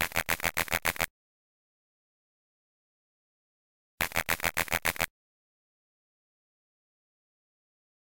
A short electronic noise loosely based on a frog croaking in the yard.